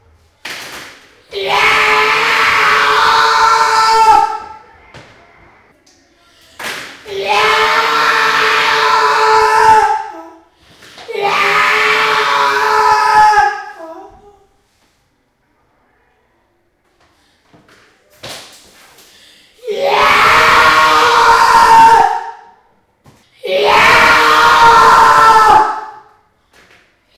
terrifying scream
Scream, Snarl, Monster, Roar, Scary, Horror, Zombie, Growl, Slow, Creature